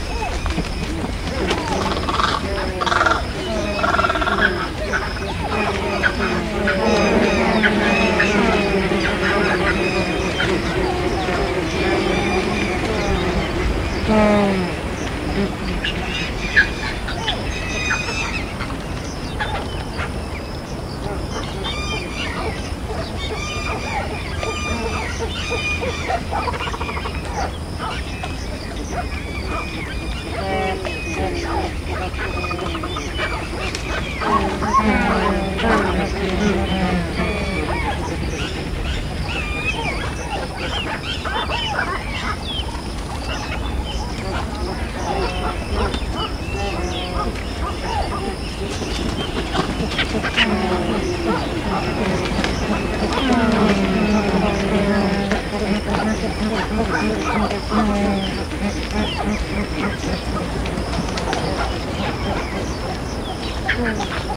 bharatpur black-necked-stork grey heron
Lots of Asian openbill Storks and Grey Herons. Recorded 11 July 2006 in Bharatpur, India on a slightly windy day.
Sennheiser MKH-415T - Sounddevices 722 - Adobe Audition (some light eq' and normalisation)
bharatpur, bird, birds, field-recording, golden-triangle, heron, india, nature, stork